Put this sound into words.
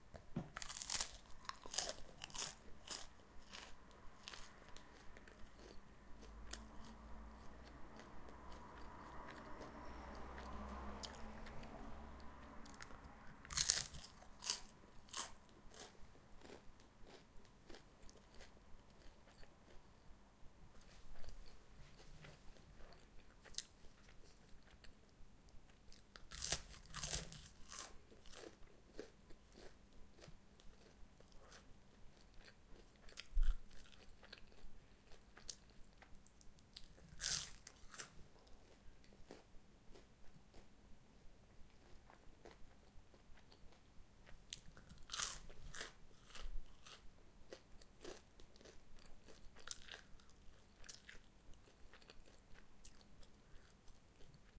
I am chewing Indoor living room A84
I eat in my living room the windows are closed we hear in the distance a car.
car, chew, eat, eating, food, indoor, livingroom, noises